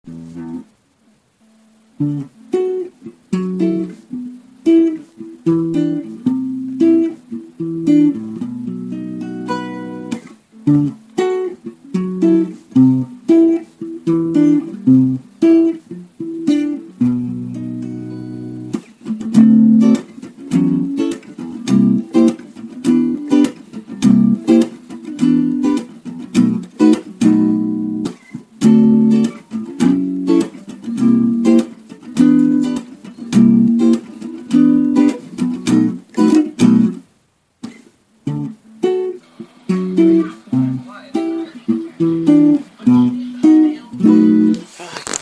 Uneek guitar experiments created by Andrew Thackray